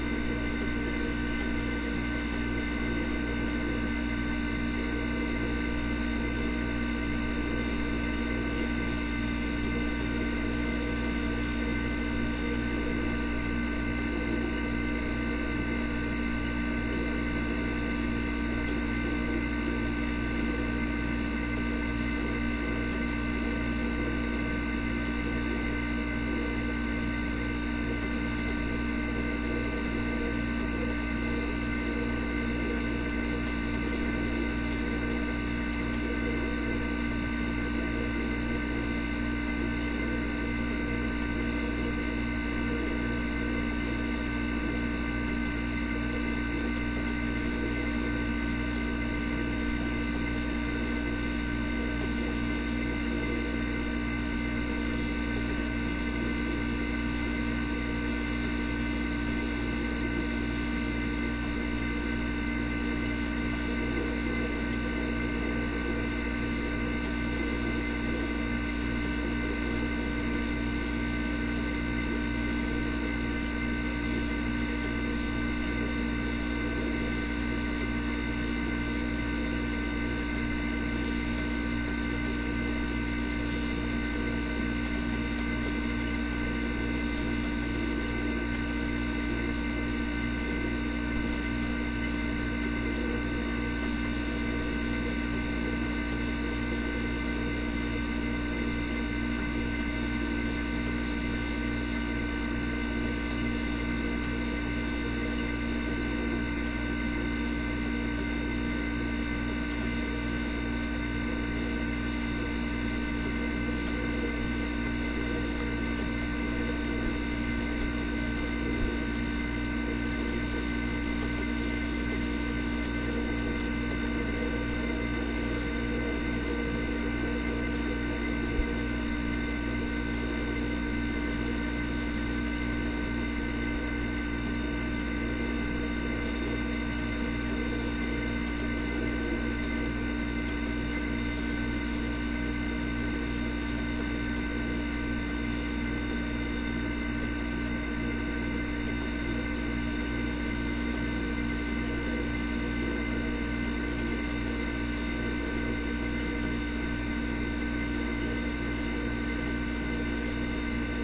fridge compressor old bubbly close
bubbly, compressor, fridge, old